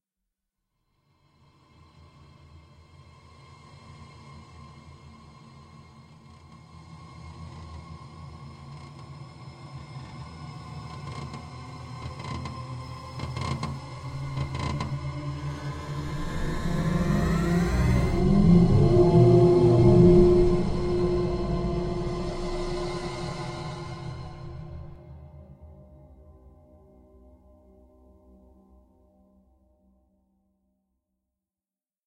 PANTIGNY JeanLoup 2017 2018 eerieEncounter
I recorded my nail sliding against the the bass E string of my folk guitar.
I then extended this sample using the Paulstretch effect, which created an atmospheric ambient sound.
Then, I used the very beginning of the original sample without altering it. It sounded like a footstep on an old wooden floor.
I copied this sound several times so that it could sound like somebody walking slowly.
I varied the pitch of some of these footsteps, and then I amplified them so that it sounds like the footsteps are
getting closer. The noise only comes from the left side at the beginning, and ends up at the middle when the door opens.
Once again, I used the sliding finger noise, reduced the tempo and added a gradual pitch augmentation to mimic
the sound of an old door being opened.
Typologie/morphologie de P. Schaeffer
(son long et varié qui le rend difficile à analyser selon la typologie et la morphologie de Schaeffer)
C'est un son continu complexe (X) auquel s'ajoute des itération complexes (X'').
fear, haunted, horror, nightmare, sinister